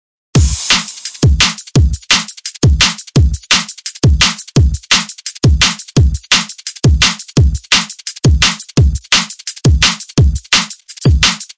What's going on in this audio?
i made this beat in ableton